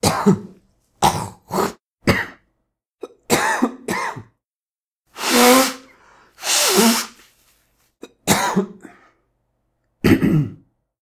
Noseblow, Cough, Throatclear
During a voice recording, I was a little ill and produced these disgusting and annoying sounds.
Recorded with a Zoom H2. Edited with Audacity.
Plaintext:
HTML: